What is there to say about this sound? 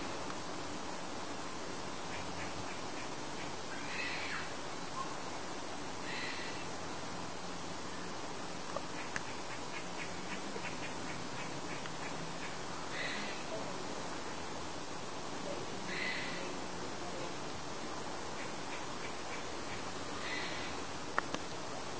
Grumpy Squirrel
A grey squirrel shouting at one of my cats. I'll try and get a better recording...
angry, gray-squirrel, grey-squirrel, grumpy, rodent, shouting, squirrel